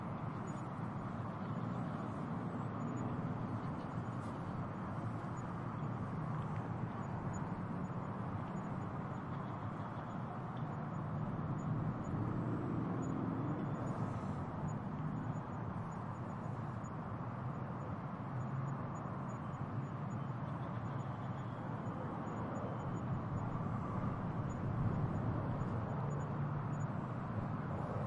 Quiet noon atmo on the National Mall in Washington D.C. next to the Washington Monument. The recorder is on the outer ring path around the monument, facing north towards the Ellipse and the White House. Traffic on Constitution Ave. can be heard in the far-range.
Recorded in March 2012 with a Zoom H2, mics set to 90° dispersion.
field-recording, athmo, quiet, wide-range, city, USA, traffic, Washington-DC, afternoon, urban, atmo, spring